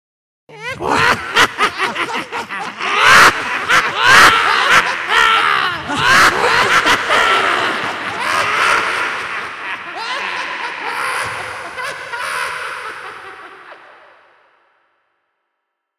evil witch laughin compilation
group laughing loudly maniacally reverb fades in
laughs, mixed